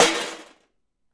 aluminum cans rattled in a metal pot